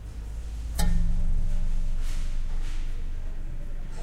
Plucking a thin piece of metal sheeting so it vibrates at the Box Shop art studio in San Francisco.
aip09, box-shop, metal, resonating, san-francisco, stanford-university